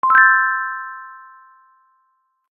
Pleasant item pick up sound.
game-sound, item, item-pick-up, power-up, video-game